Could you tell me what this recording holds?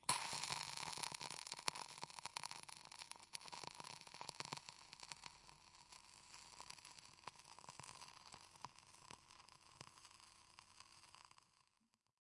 Match extinguish-1
Match extinguished in water, taken with zoom H2N.